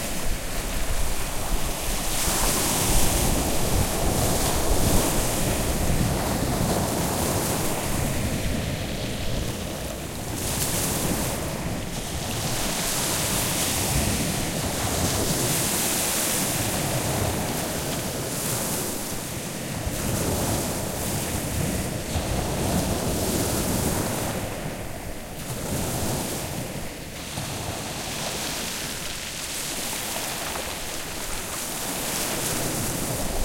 BEACH-WavesOnPebbles
Stereo recording with linear mics of waves on pebble beach.
shore, water, seaside, sea, beach, waves, coast, ocean, wave